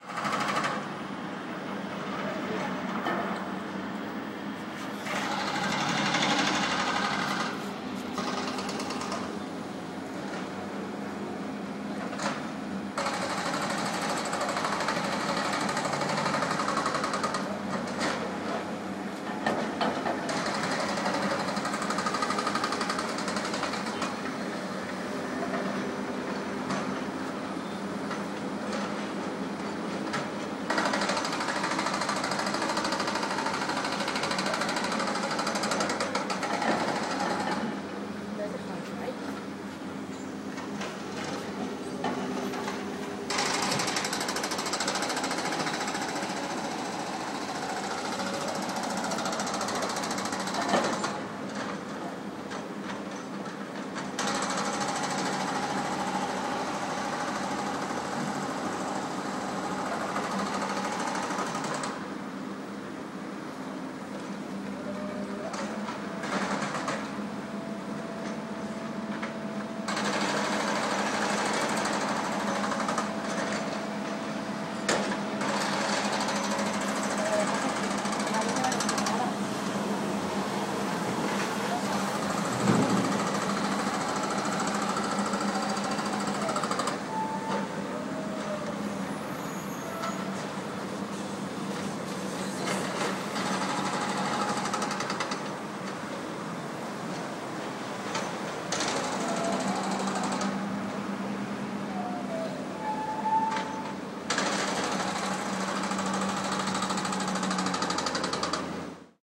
obras especulosas
especulation, field-recording, n, escavadoras, construction, obras, especulaci